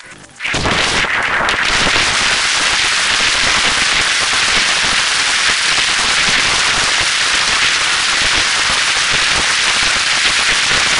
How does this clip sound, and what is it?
sample exwe 0319 cv fm lstm 256 3L 03 lm lstm epoch6.16 1.6655 tr
generated by char-rnn (original karpathy), random samples during all training phases for datasets drinksonus, exwe, arglaaa